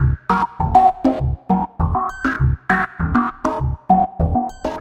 Regular, weighing, quite hypnotic industrial loop. Some harmonic notes around Cm7b5. 100 bpm.
ambient, filtered, rythm, percussion, resonant, dark, loop, processed, machine